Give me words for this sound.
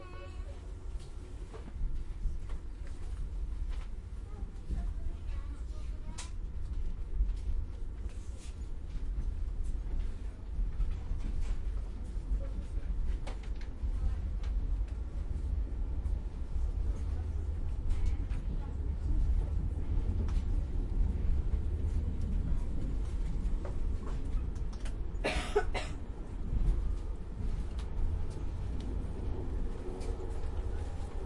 inside quiet train
commuting, inside, rail, train